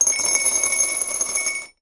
A lot of dice (different sizes) being dumped into a ceramic container.